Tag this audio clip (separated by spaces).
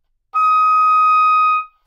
single-note multisample oboe Csharp6 good-sounds neumann-U87